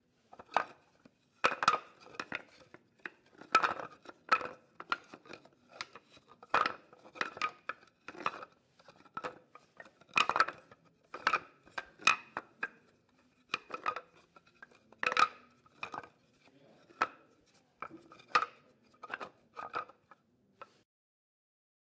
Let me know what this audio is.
Wooden Blocks
There were loose wooden floorboards so I wondered what sound they would make when banged together
Recorded on the Zoom H6